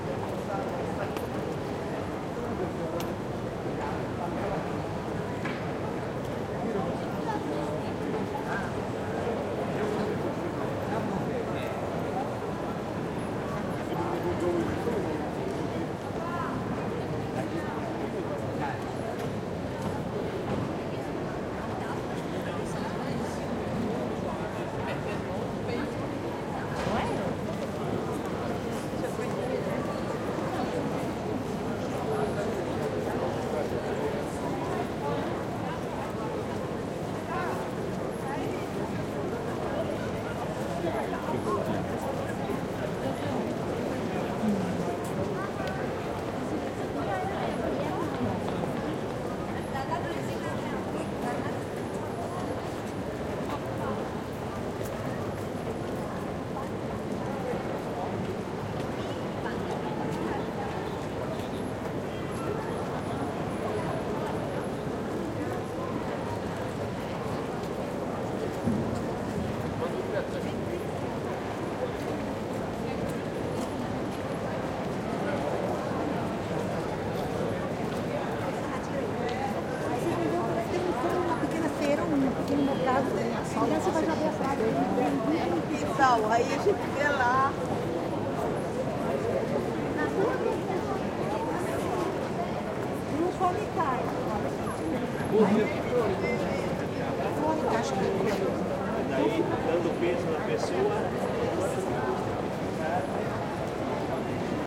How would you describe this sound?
140812 Vienna StefansplatzAida R
4ch surround recording of the Stefansplatz in Vienna/Austria, near the Café Aida. It's a weekday afternoon in summer, lots of people are walking around the recorder on diverse shopping errands.
Recording conducted with a Zoom H2.
These are the REAR channels, mics set to 120° dispersion.